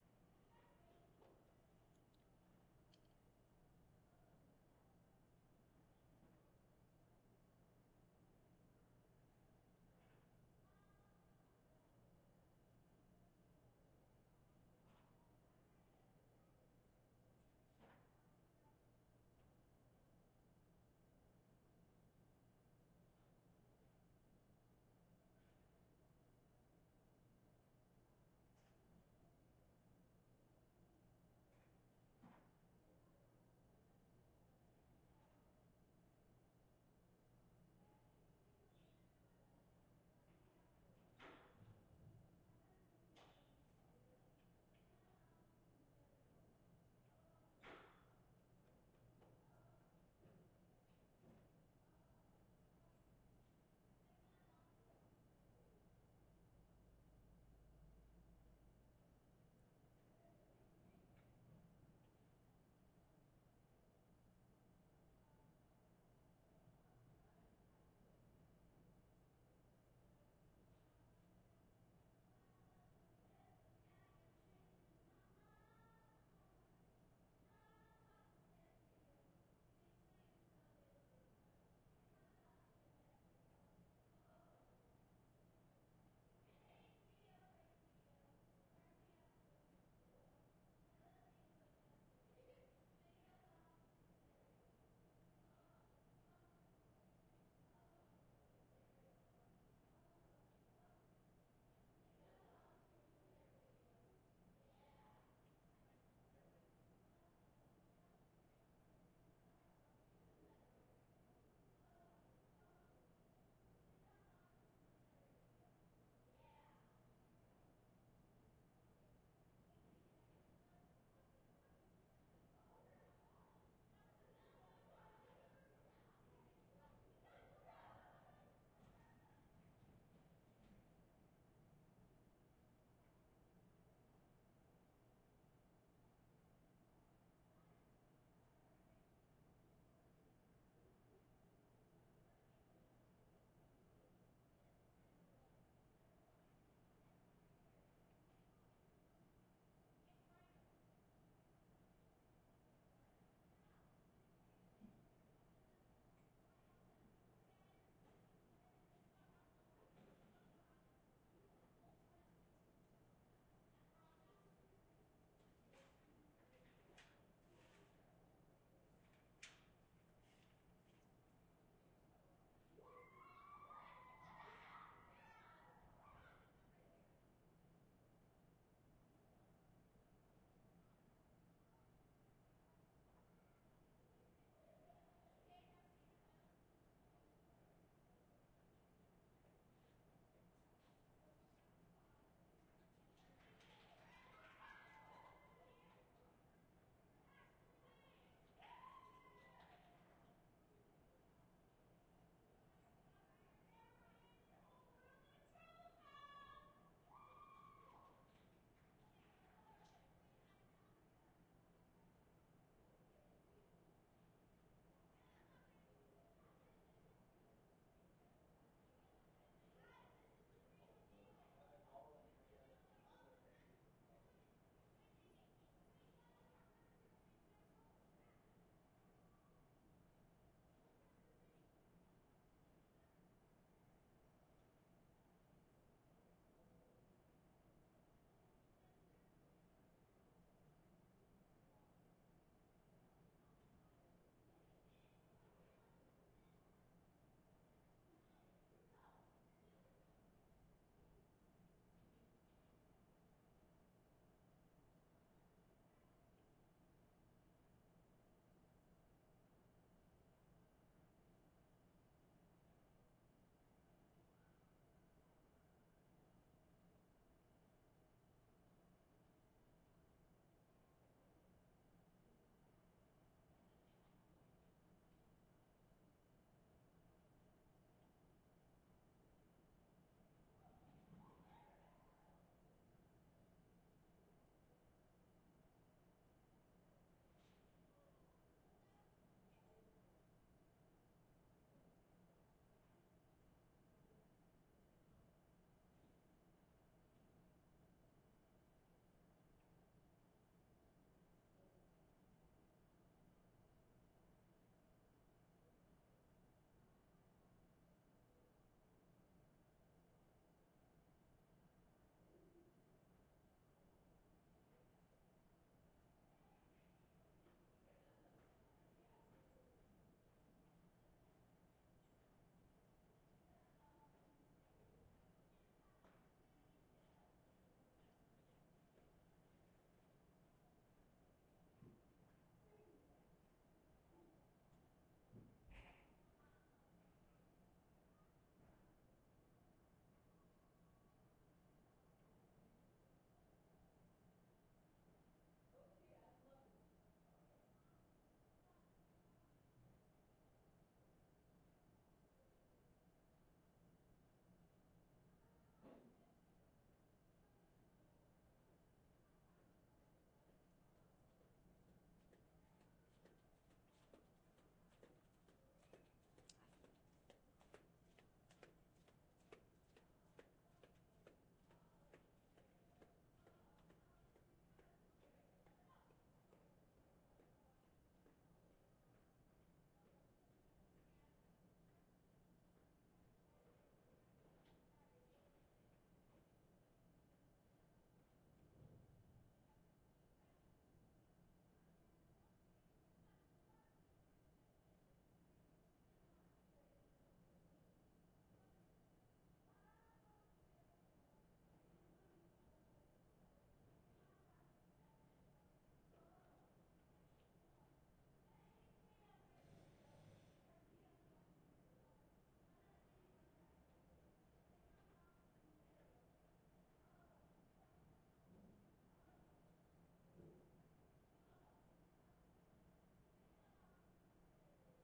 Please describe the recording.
AMB School Hallway 001
This is a school hallway recorded between classes. You can hear distant kids in their classrooms, and the occasional voice in the hallway.